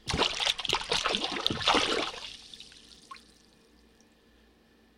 Splash made in a plastic utility sink filled with water. No reverb applied, sounds like it's in a small room. Originally recorded for use in a play.